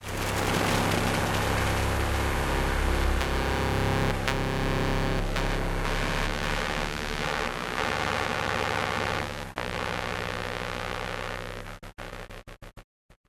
Sci-Fi - Effects - Interference, drone, noise 02
ambience, buzz, buzzing, charging, cinematic, circuits, drone, electricity, engine, field-recording, fuse, futuristic, hum, interface, interference, neon, noise, power, sci-fi, scifi, sfx, Sound-design, Sound-Effect, soundscape, space, spaceship, swoosh, transition, ui, whoosh